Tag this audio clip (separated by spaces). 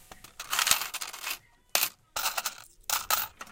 cash-register coins money